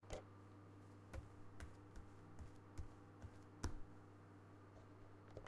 Bare-feet footsteps across a tiled floor.
footsteps, bare-feet, floor